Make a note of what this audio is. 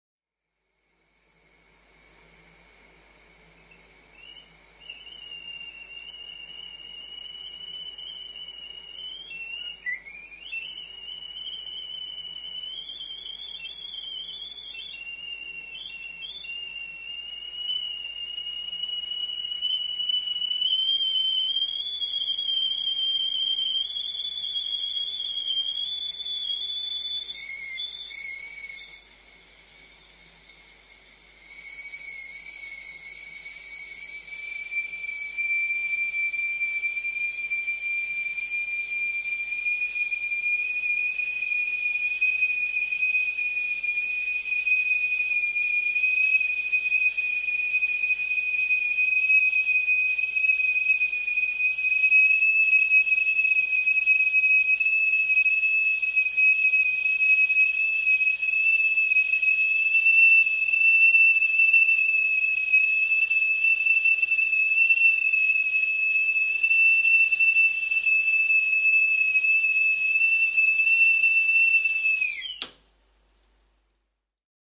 whistling kettle1
The Sound of a Kettle whistling as it comes to the boil on a gas hob.
Recorded on a Tascam DA-P1 Dat recorder and a Rode NT1000 microphone